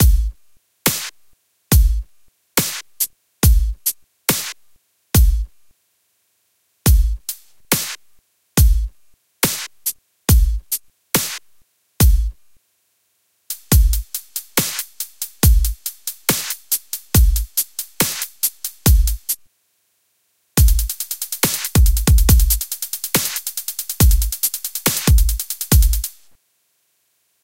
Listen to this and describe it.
140 BPM Basic Dubstep Drum Beat
This is a basic dubstep beat. It was done in Reason 7. It is useful in that it shows you a good way to construct a beat. It consists of four 4-bar measures, each progressing from the previous. In this way, you can see how to write a good beat, by starting off simple and building from there. Traditional dubstep drums are very spare and sporadic with the use of symbols. I never liked drum beats until I heard dubstep !
Dubstep-Drums, 140, Drum-Groove, Drum-Beat, Drums